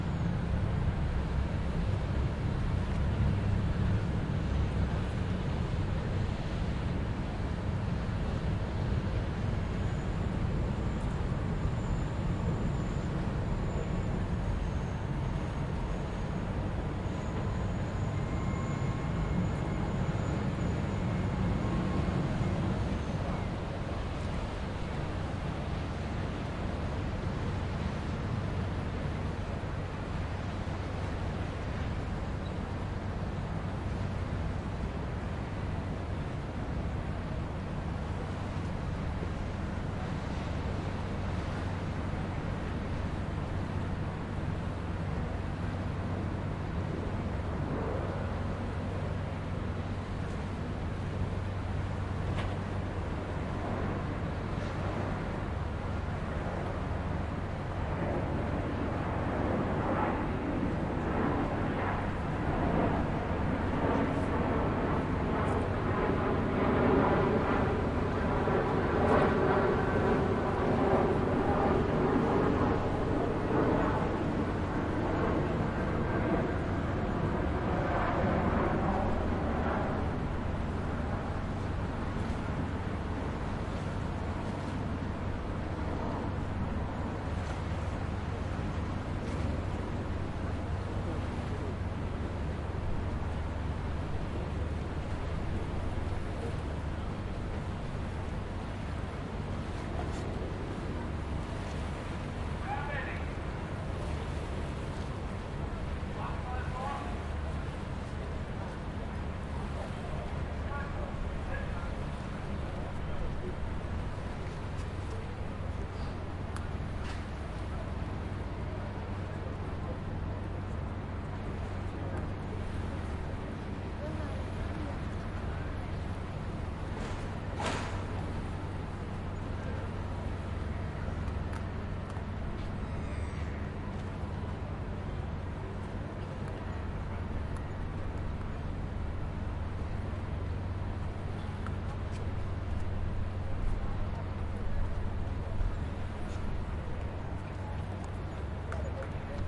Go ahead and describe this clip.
Thames Shore Nr Tower
People/Tourist walking on the embankment of the River Thames at the south side of the Tower of London.